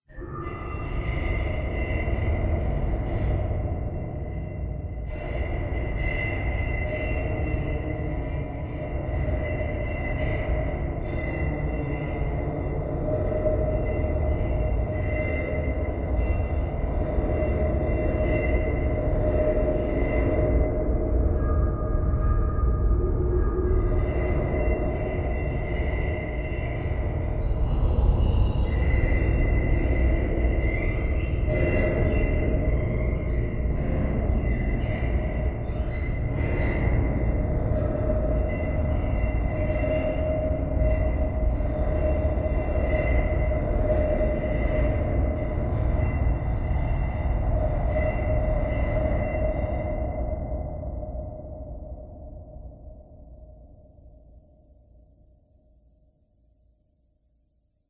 Creepy Ambient Noises
Creepy noises in the distance. Got the inspiration from watching The Colony when they suddenly start hearing strange sounds from far away of the zombies/cannibals living in the shelter. This sound could really be used for a LOT of things!
and one of my own deep rumble ambiences
It won't take long, it's super easy and you'll totally make my day!
Ambience, Background, Colony, Creak, drone, Loud, Scary, Sci-Fi, Strange, Zombie, Zombies